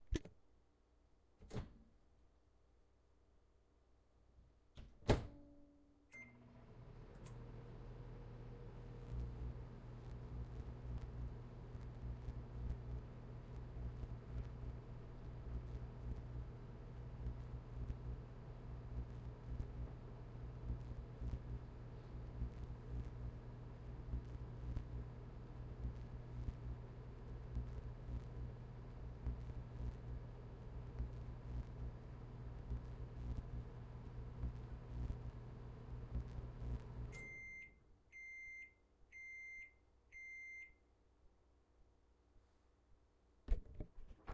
using a microwave for 30 seconds
beep, microwave, cook, opening, kitchen, cooking, food